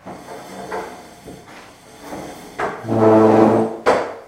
dragging metal chair
chair, metal, dragging